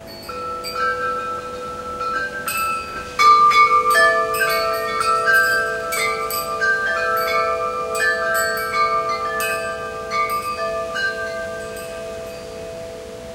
chimes part 5
These short files are random selections from a 9min composite audio file I put together from an accidental recording made when I left my Sony Camcorder on in my studio.
They are part of the same series posted elsewhere on thefreesoundproject site titled "accidental recordist".
There is some hiss/background noise which is part of the street front urban scene of my studio.
tinkle, instrumental, wind, music, chimes, bells